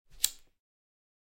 Classic clipper lighter
collection, lighter